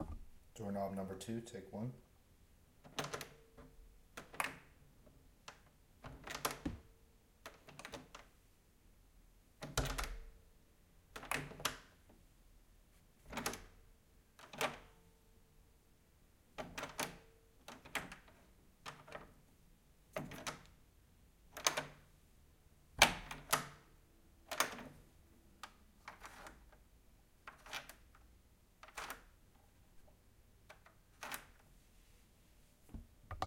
AAD Door Knob 2 - 1
This is one of our raw recordings no treatment. This is an M-S Stereo recording and can be decoded with a M-S Stereo Decoder.
Close, Creak, Door, door-Knob, Old, Open, Wood